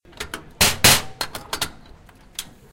Hitting a coffee machine at Poblenou Campus UPF bar.